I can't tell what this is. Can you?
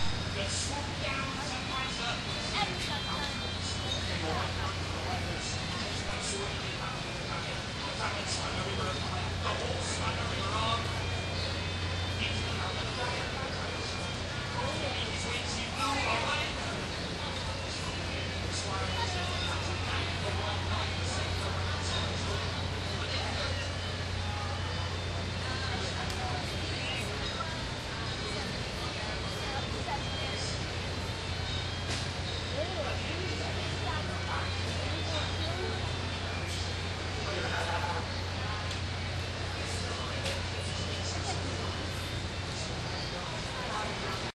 washington restfestival
Resting across from the Natural Science Museum as a festival of some sort goes on in the middle of the National Mall recorded with DS-40 and edited in Wavosaur.